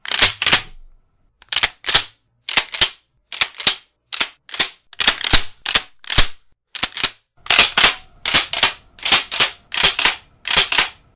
Cycling the pump action on a shotgun. The audio is very generic and could even be used for a pistol action cycle.
Recorded on a Blue Yeti Microphone through Audacity at 16bit 44000Hz.